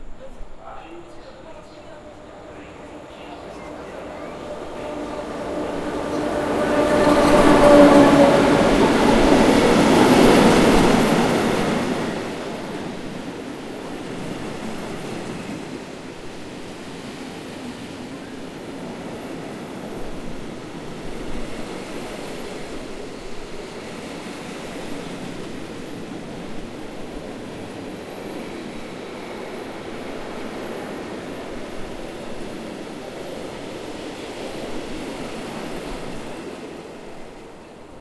train passing by
Recording on a czech railwaystation. Zoom HN4
by, pass, passing, rail, train